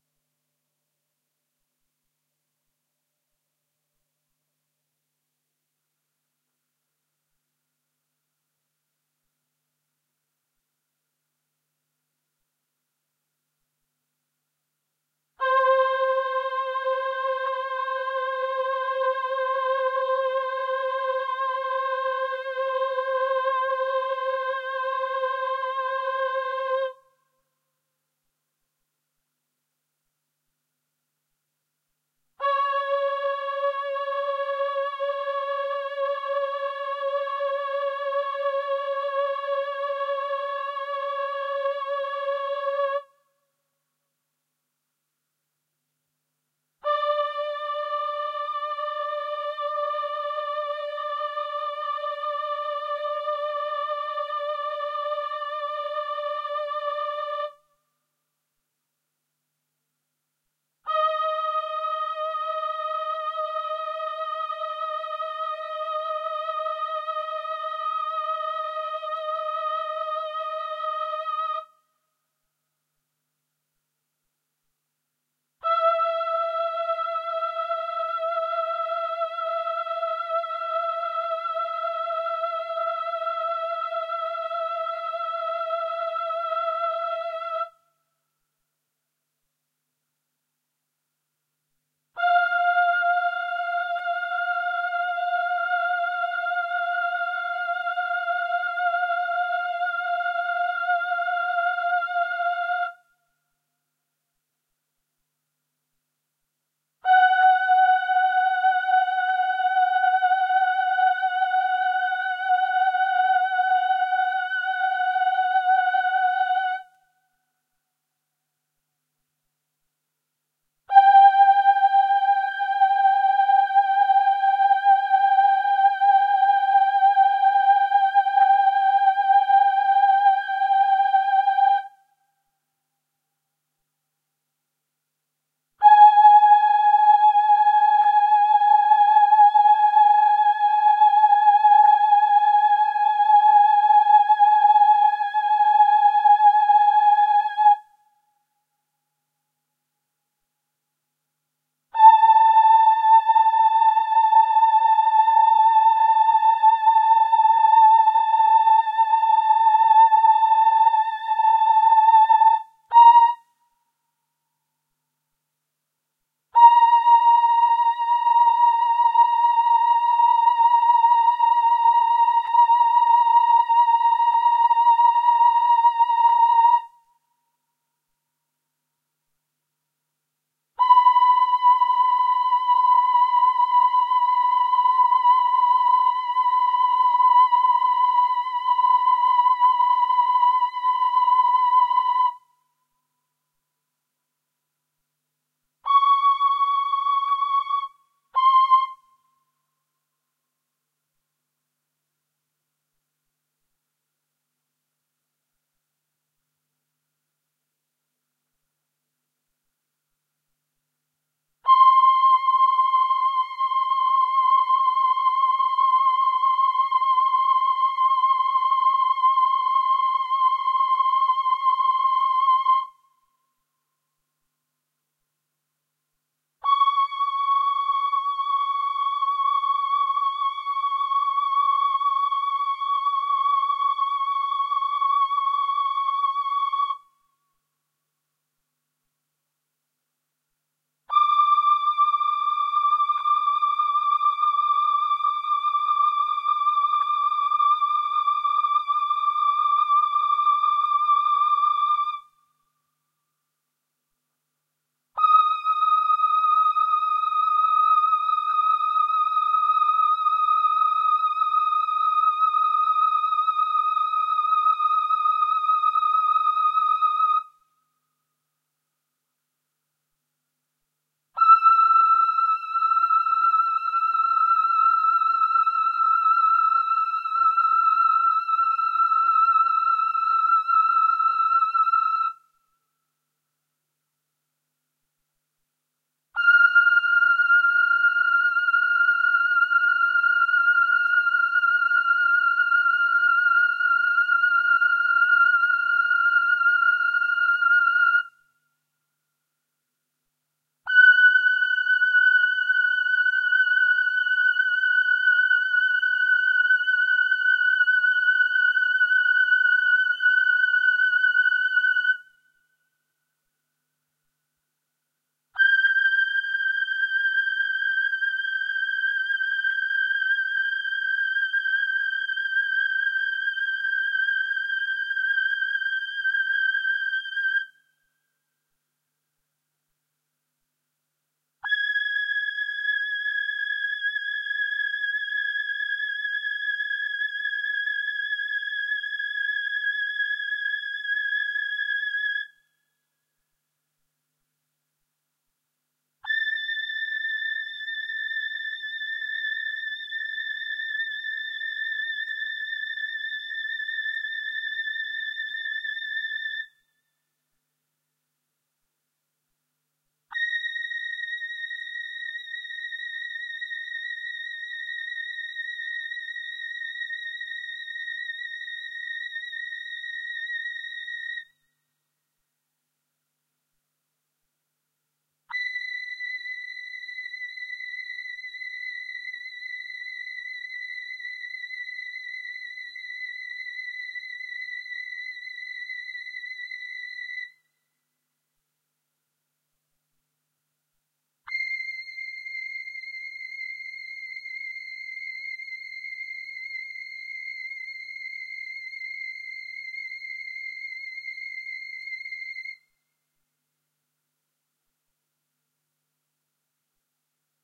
09 VP-330 Human Voice Female 4' c2-c4 in Halbtonschritten Ensemble Rate 0 Delay Time 5 Depth 5